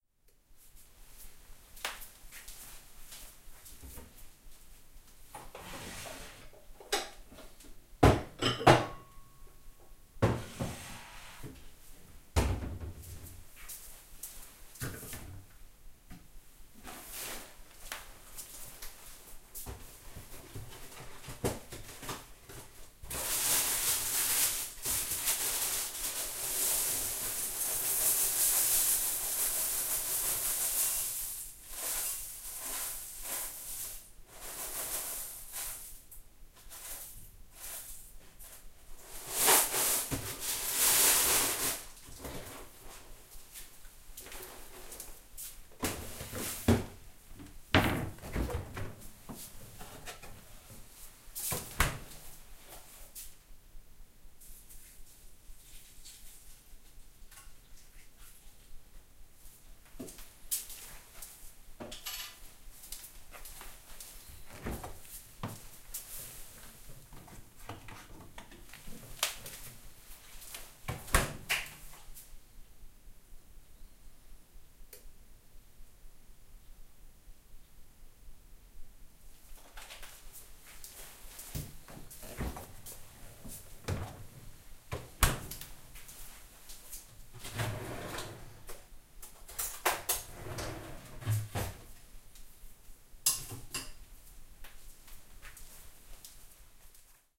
Put the Zoom H5 on a tripod in the kitchen. It was just under a metre up from the floor.
The recording is then of me preparing breakfast (cornflakes). Sounds include fridge door, cupboard door, bowel being taken from cupboard, cornflakes being poured into the bowel and opening and closing of a draw to get a spoon.